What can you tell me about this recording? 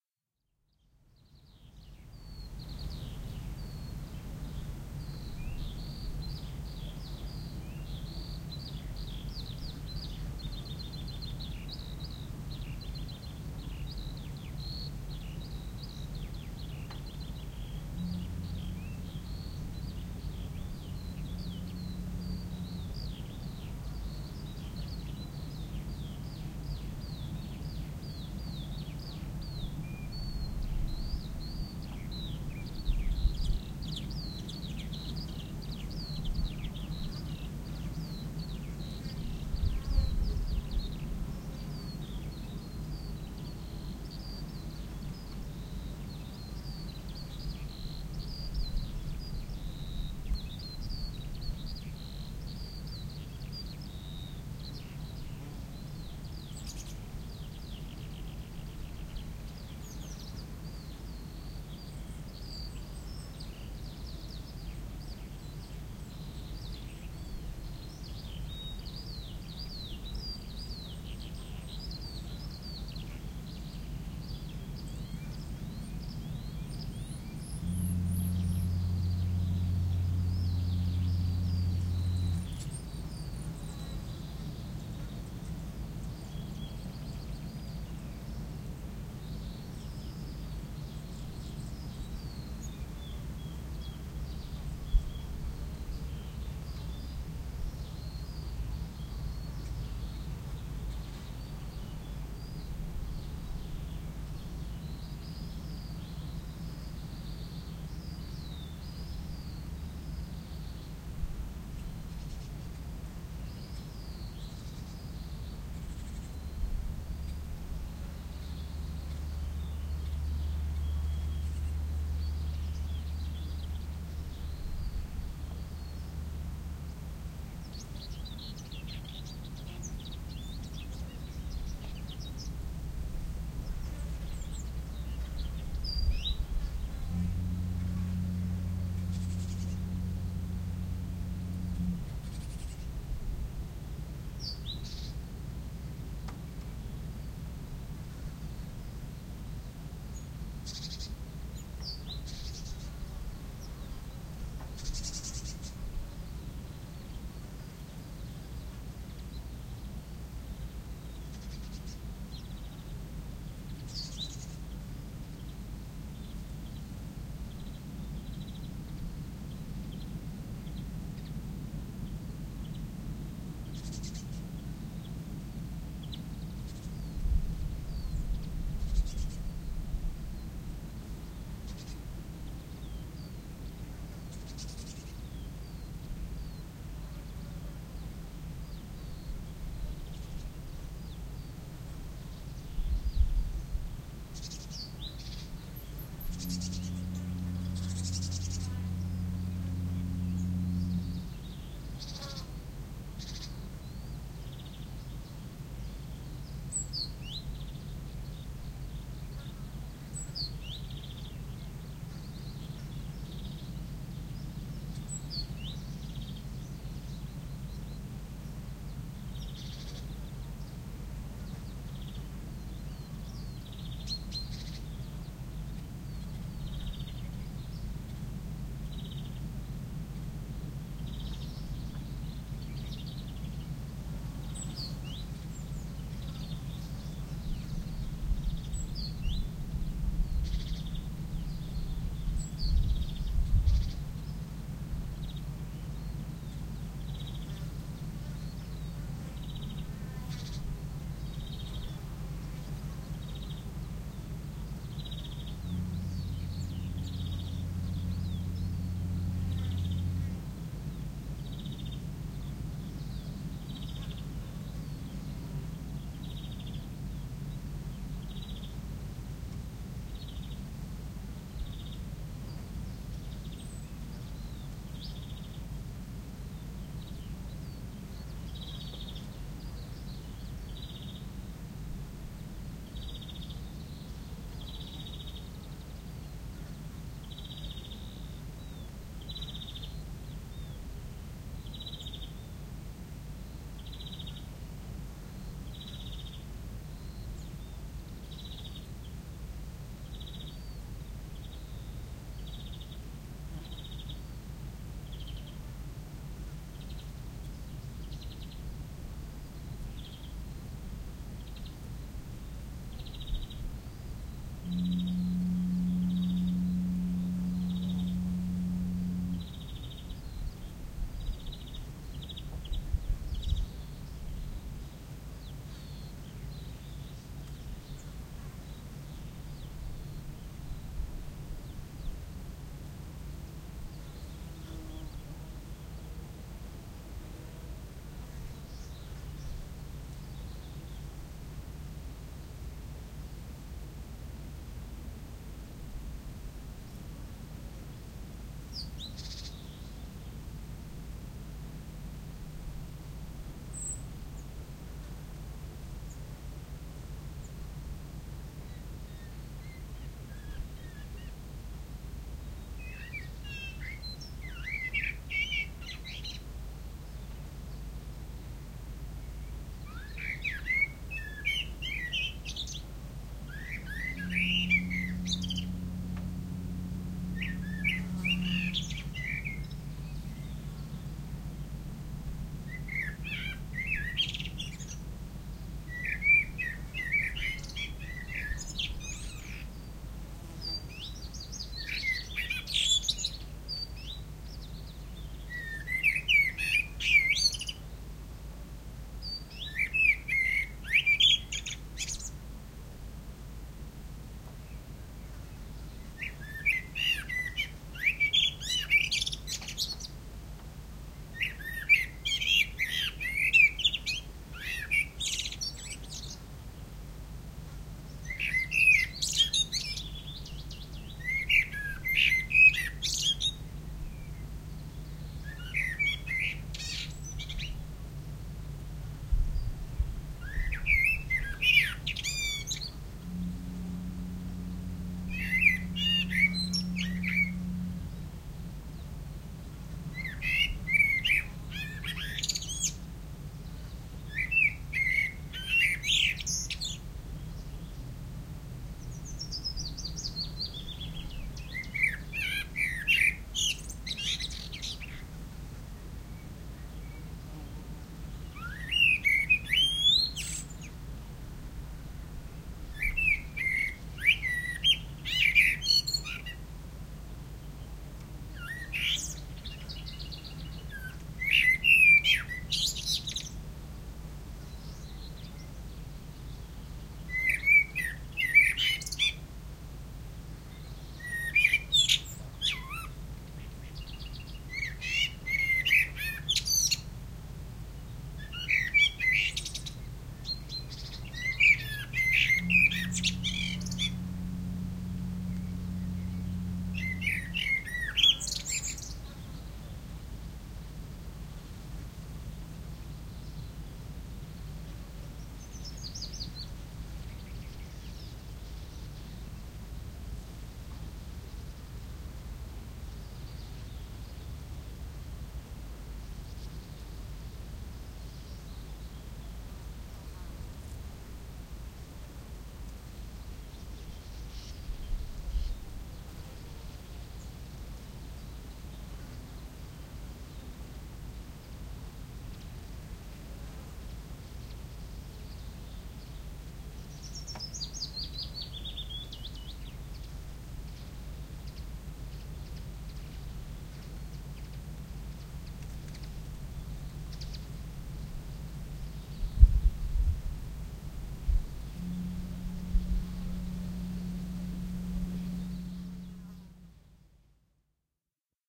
A subtle garden atmosphere. Drone ambiance. Birds singing at various distance, an occasional flee passes by, a distant ship, Wind. Recorded at Røsnæs, Denmark in May 2008 with Zoom H2 build-in microphones.